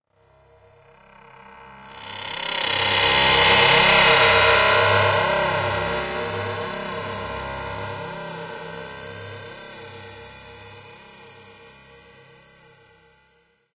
Time reversal
horror-effects, terrifying, horror, sf, thrill, horror-fx, suspense, terror, drama